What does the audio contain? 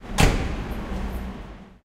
Car door bang 2
Sound of closing a car door in big car park (noisy and reverberant ambience).